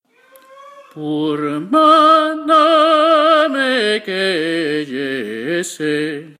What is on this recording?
This is a fragment from the aria ah mes amis at a lower pitch and badly performed. Iintend to use it for a Coursera homework (Audio Signal Processing for Music Applications).
ah-mes-amis, tenor, low-pitch, transpose